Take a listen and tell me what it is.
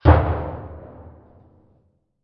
Big Trash Can Hit
A slowed down hit on a trash can with added reverb.
Hit, MTC500-M002-s14, Trash